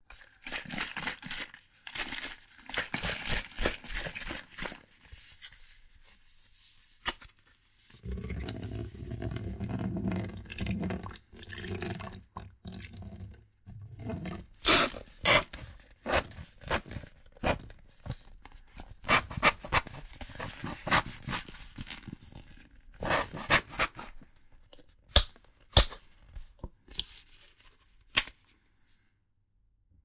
delphis PLAYING WITH TUBES AND WATER 1
h2o, h4, tube, tubes, water